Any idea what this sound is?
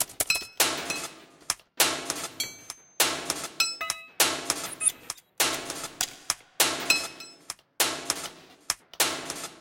Experimental percussive loop 22092016 100BPM
Small loop I threw together using some sounds I recorded recently.
Processed and sequenced in Native Instruments Maschine.
100bpm, experiment, experimental, loop, looping, percussion, percussive, processed, recordings, rhythm, samples, sequence